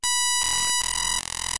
This is a recording of unwanted noisy output I was getting from my USB audio interface.